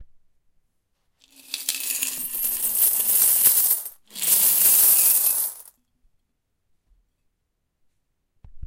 Coins Being Poured
Pouring lots of coins out of a jar onto a carpet.
cash, gambling, coin, jar, casino, pouring, game, Coins, money, poured